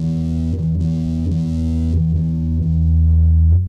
axl open big E
AXL Les Paul copy run thru a Digitech RPS distortion, big E string, open. I think the amp is possibly my Marshall MG 15, i recorded it myself, unsure the mic, either the laptop mic or a Guitar Hero USB mic.